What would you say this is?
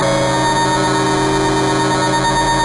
Digit Bleed
digital, fx, harsh